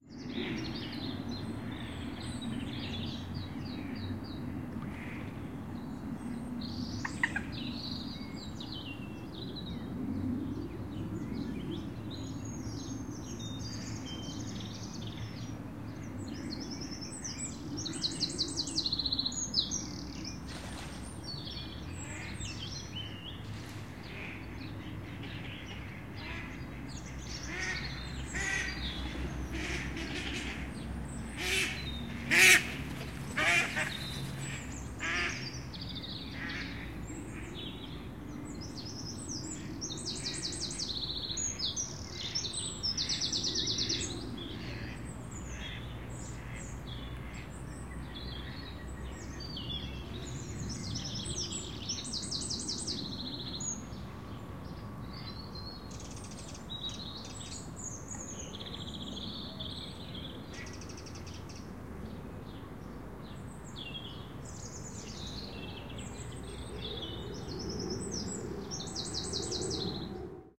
London Park by tree lined Pond
Recorded using Zoom H4N on parkland in suburban London. Sounds associated with a small pond including waterfowl (Ducks Geese etc..)and typical London birds in the background.
Sunny Day in April
birds, water, london, atmosphere, waterfowl, pond, park